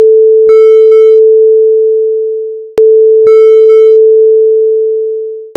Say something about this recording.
Sound with echo which augments little by little. Change of tempo and repetition (10). There is an effect which allows to melt in closing.
echo, sound